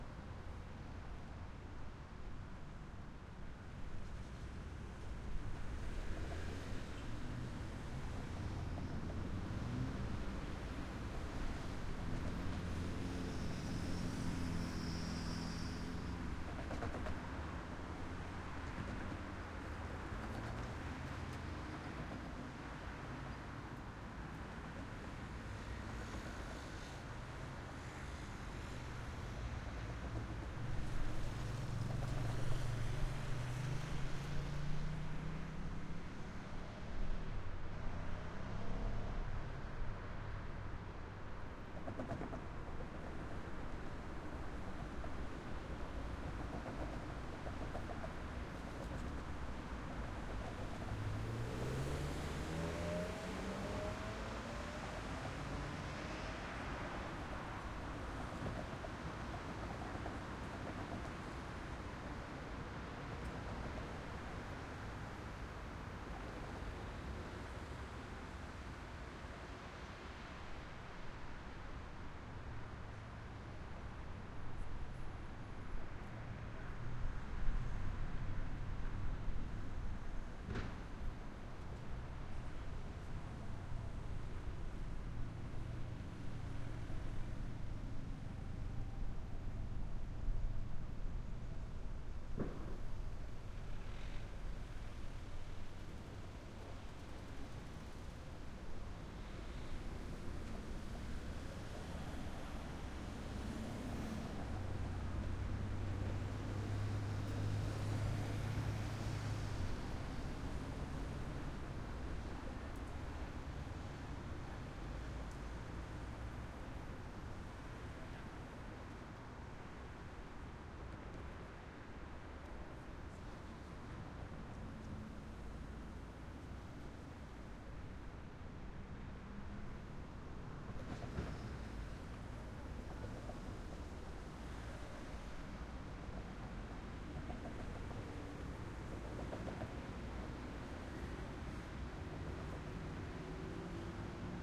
090404 01 frankfurt soundscape road traffic
frankfurt, road, soundscape, traffic